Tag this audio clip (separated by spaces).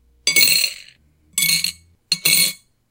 cash coin money